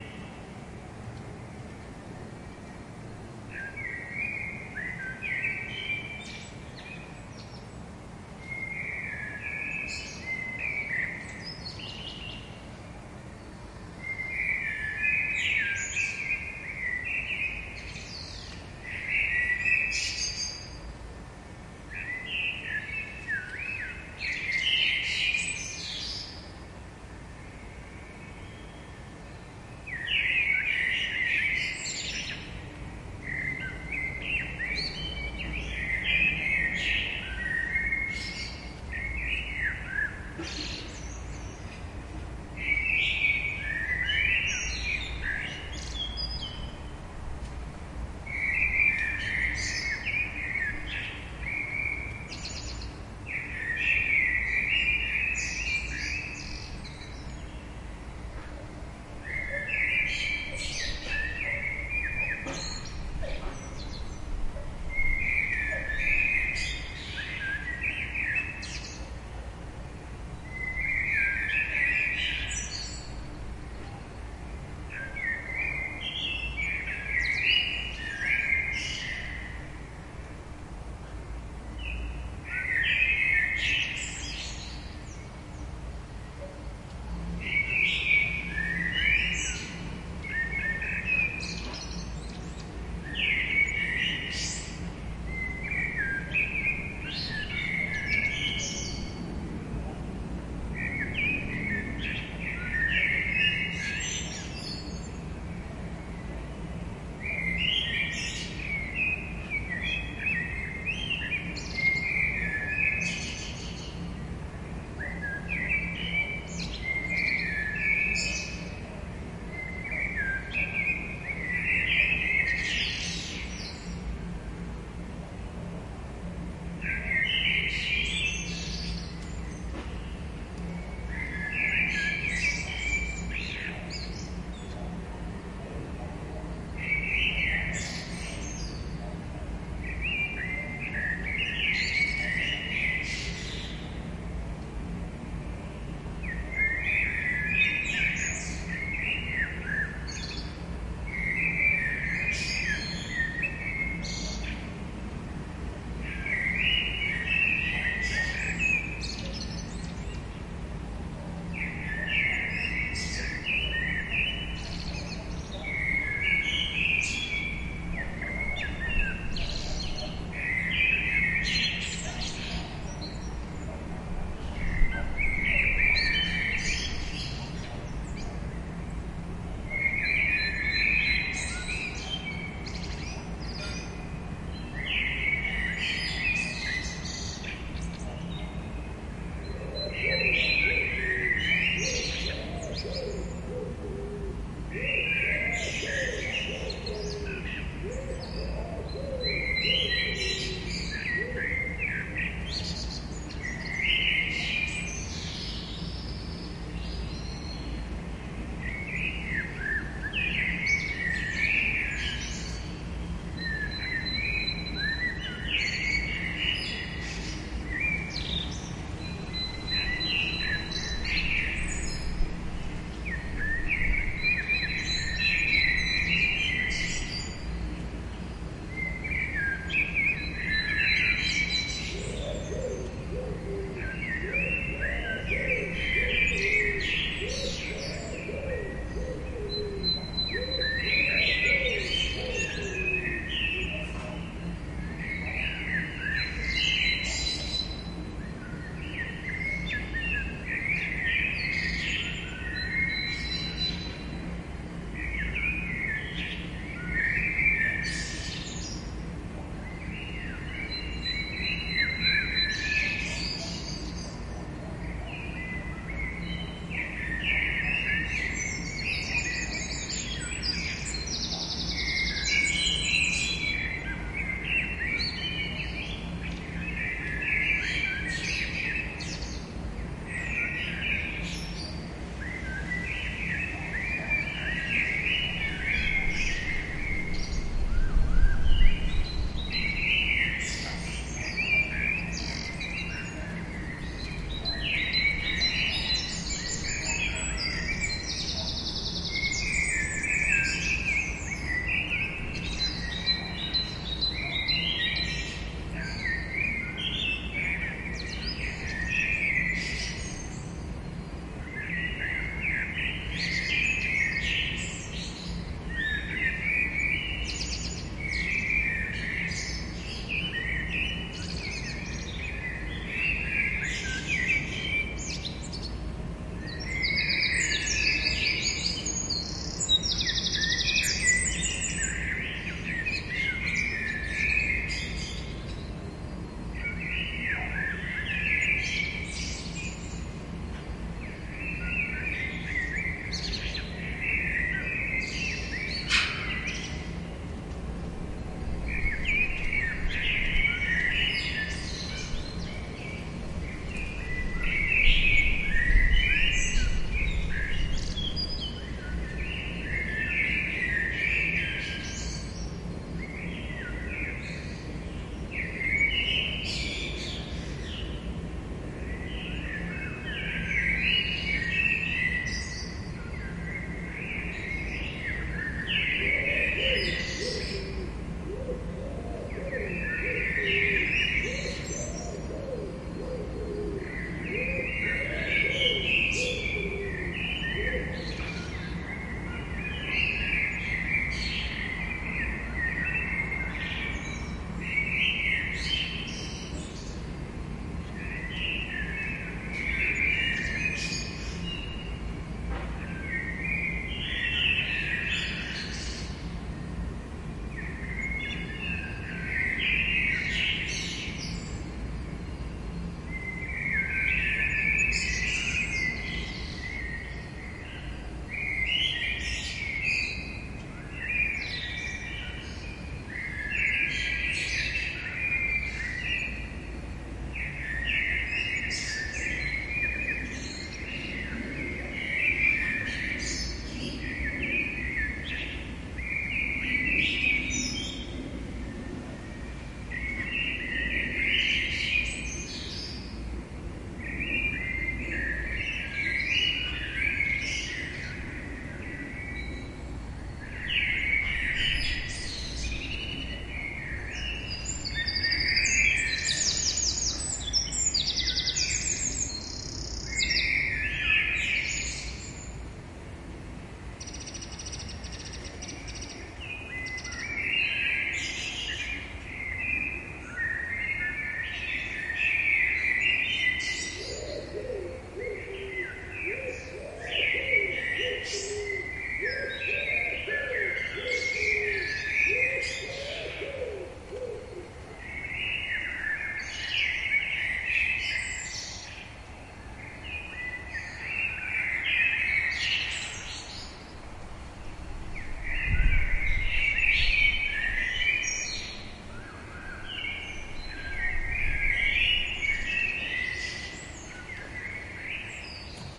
AMB Birds in a Garden in Brussels 2014-03-30@5am
Recorded on 5am in Brussels